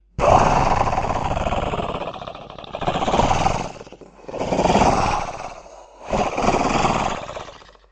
Kinda sounds like a giant evil feline purring, it's me making a machine gun noise and slowing it down.